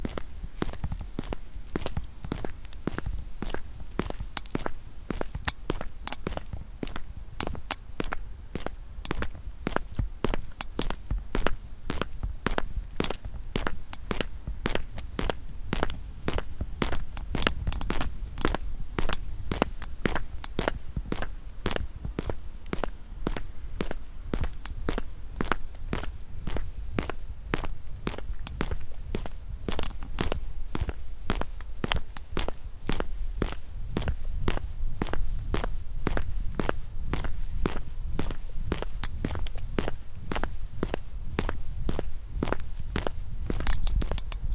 Footsteps from boots on a sidewalk.